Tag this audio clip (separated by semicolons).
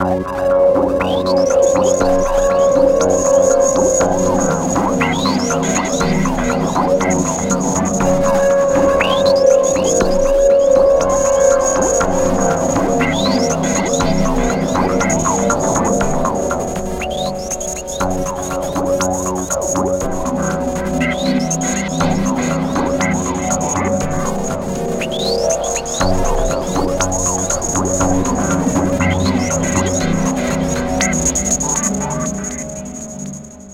1024; 8-bit; 8bit-era; 8bit-music; 8bit-sound; atari; chipsound; electronic; retro-game; robot; spectrum-music; speech; ZX-Spectrum-music